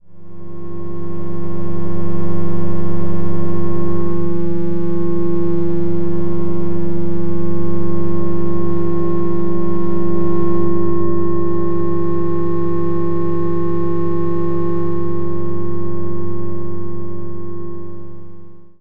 4 oscillators and pan modualtion